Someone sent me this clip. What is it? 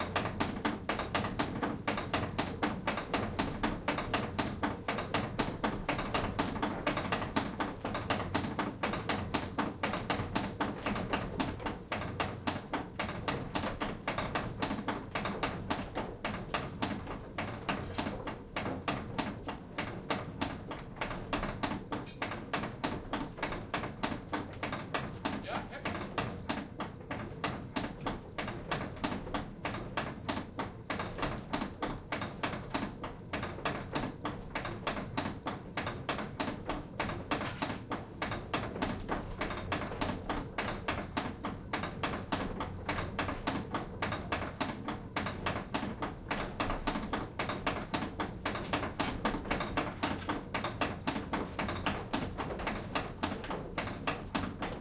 Inside a traditional Dutch windmill, 1
This is the first recording in this pack from inside a traditional, working old Dutch windmill, called De Lelie - The Lily - in the picturesque Dutch village of Eenrum. This mill was used to grind grain. There was a strong northwesterly breeze straight from te sea, so the sails of the windmill were in full swing, this morning on Saturday may 14th.
The dominant sound you hear is the sound of the so called “schuddebak”, litterally translated as “shaking bin”. This is a device in the form of a large wooden shovel which is tapping against the turning millstone in order to distribute the grain equally along the millstone. On the background you hear the millstone itself. On the second recording in this pack, the millers had detached the schuddebak, so the sound of the millstone is more dominant in that recording.
This windmill is now a small museum.